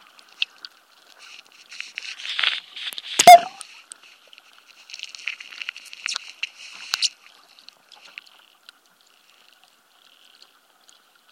Recorded while adjusting positions; hydrophone hits something making a loud overloaded sound. I suppose someone might like this.
glitch, hydrophone